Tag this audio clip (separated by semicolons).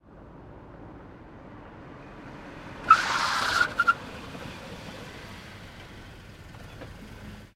handbrake; car; brake; crash; fast; emergency; tyre; speed; tyres; skid